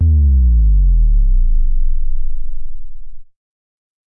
Use this sound to bring more depth in your production
low, sound, bas